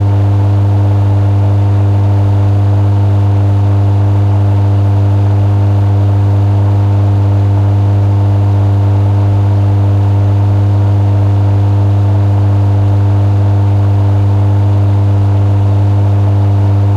Water Pump. recorded with Zoom H1.
industrial, pump